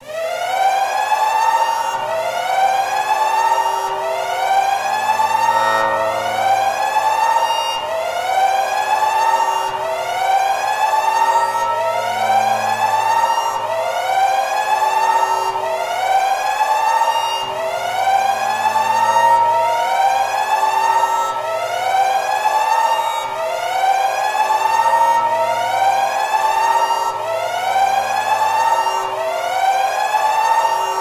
EMERGENCY SIREN
LOUD - ALARM - REALISTIC
[1] This loop was Made from scratch In Fl studio.
[3] Comment for more sounds like this!
Feedback is appreciated! Make sure to credit and send me if you end up using this in a project :)! Scare your friends or something!
siren, fl, warning, future, fiction, ringing, loop, bass, sci, ambient, synth, sequence, alert, synthesizer, electronic, emergency, studio, sound, machine, alarm, fi